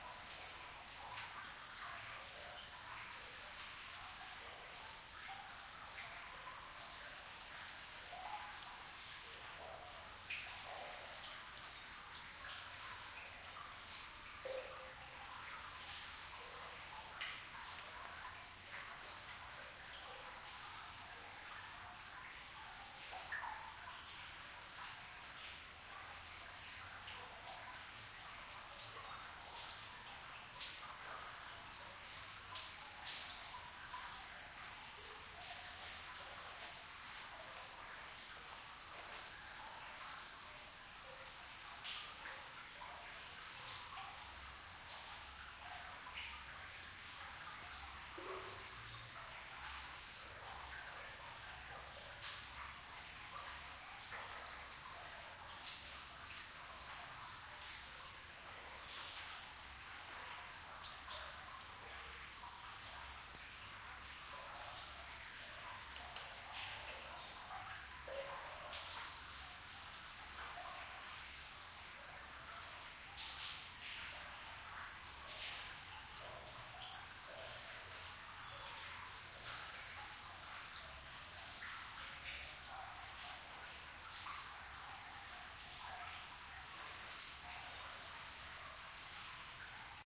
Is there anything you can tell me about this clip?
Cave water drops
In a medium size cave, water flowing at distance and water drops.